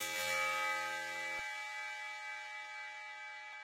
Another metal'ish sound. Made with some additive synths.